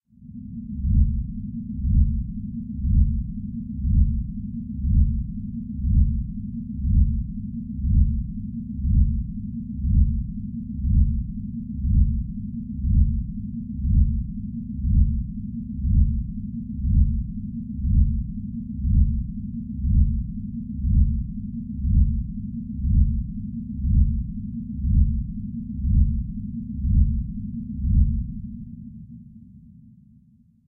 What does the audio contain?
Mixdown whoosh
A synthetic sound made in response to a sample request by Jermah.